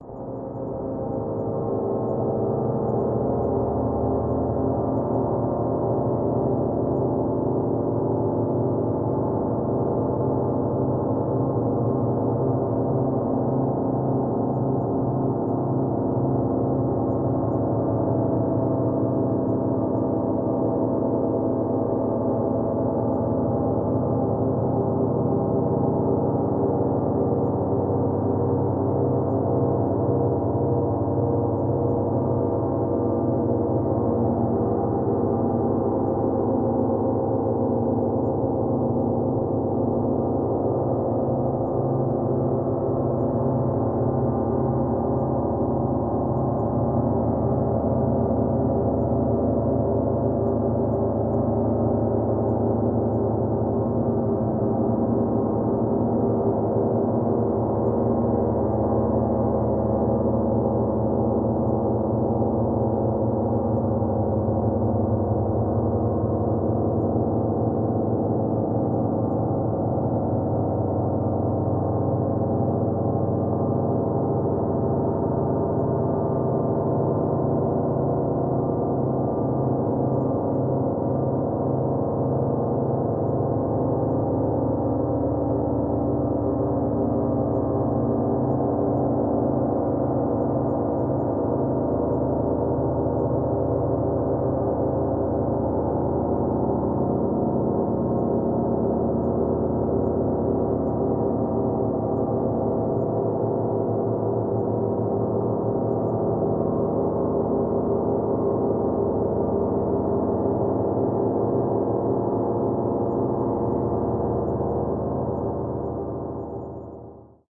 gloomy ambient pad
a dark, slow ambient pad. the original sample was a recording of rain on a canvas tent.
EM172-> DSP (eq/reverb/nebula saturation)
ambience, ambient, atmosphere, background, dark, effect, gloomy, pad, sfx, slow, sound-design, synthetic